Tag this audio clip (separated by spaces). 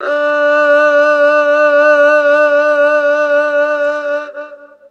Erhu Strings Chinese-Violin